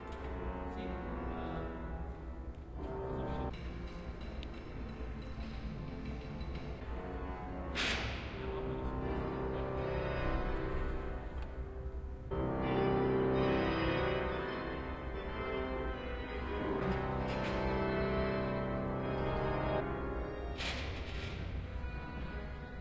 ZKM HFG Karlsruhe Indoor Atrium Organ Rehearsal